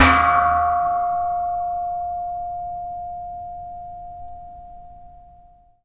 One of a pack of sounds, recorded in an abandoned industrial complex.
Recorded with a Zoom H2.
percussive, high-quality, metal, city, percussion, metallic, field-recording, clean, urban, industrial